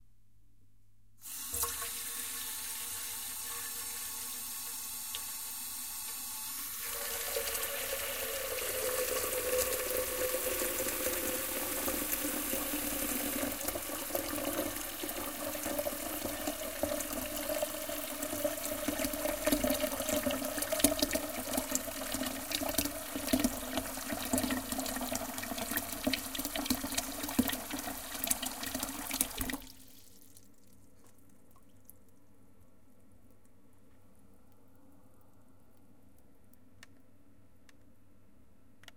water into pot
Noodles need much water and you may need some sound of that, idk :3